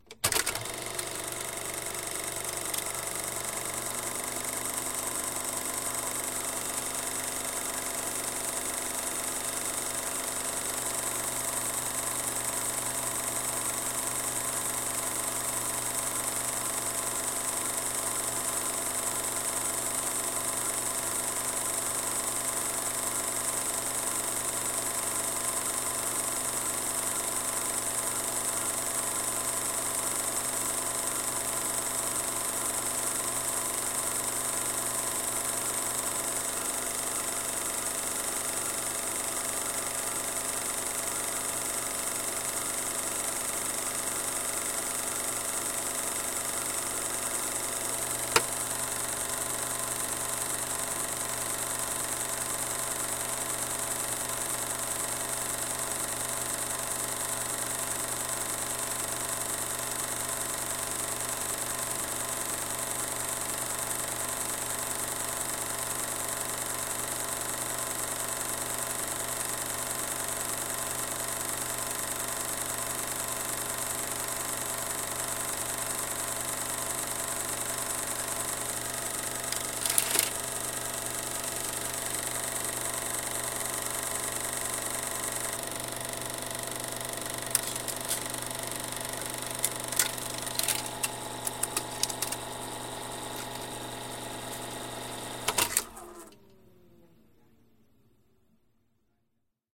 Film Projector - Long Run with Finish
An 8mm film projector being turned on. The projector then runs for over a minute, with some changes in pitch as the reel grows smaller. The reel then reaches the end and is pulled through the projector before the projector is turned off.
8mm, finish, reel